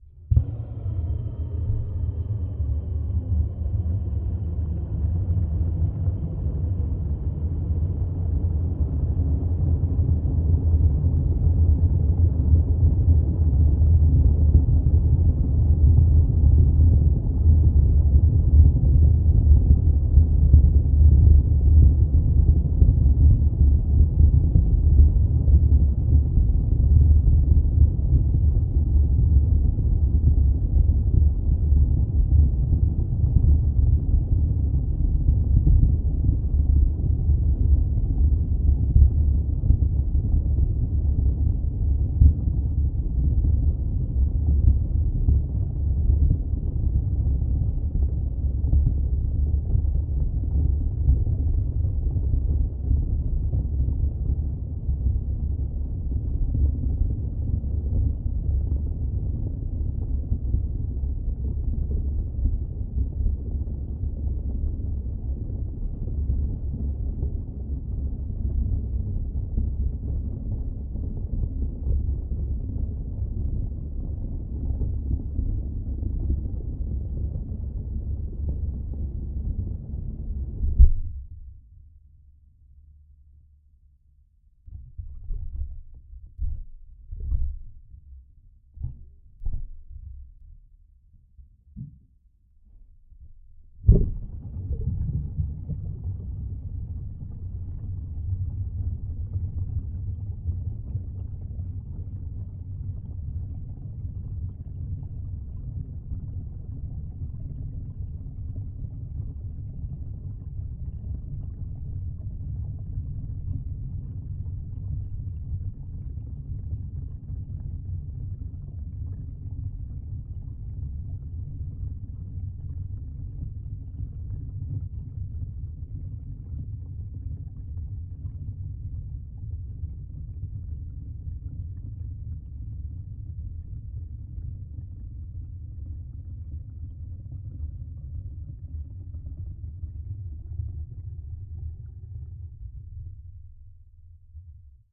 Kitchen Sink Contact Mic Recording (Geofon)
Recording of a kitchen sink filling and draining. This was recorded using a LOM Geofon contact microphone into a Sony PCM-A10.
PCM-A10, soundscape, contact-mic, water, drone, contact-microphone, sink, draining, basin, apartment, ambience, ambient, plumbing, kitchen, splash, recording